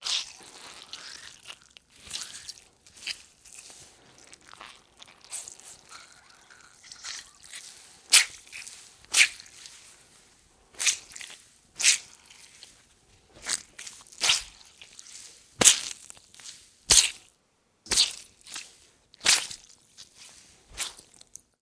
SoS SpookySounds Squish01
Day 1 we recorded squishing sounds that work as a sweetener for stabs, guts, etc.
Go behind the scenes to see how these sounds are made:
Tune in Daily (from Oct. 26th-31st) to our Channel to go behind the scenes and learn how to create some spooky sound effects in time for Halloween!
Follow us:
Find us on the web: